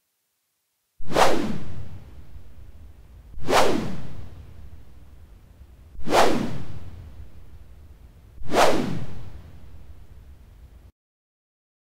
whooshes whoosh swoosh Gust